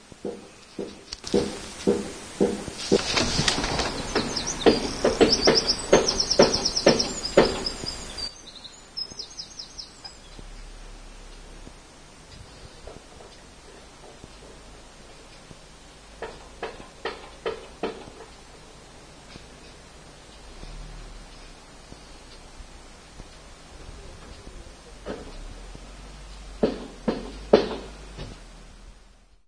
hammering quiet with neibourhood reverb
Some person was working early one sunny morning and through the window I could hear these sounds which caught my attention because of the reverb of the hammer on wood all through the neighbourhood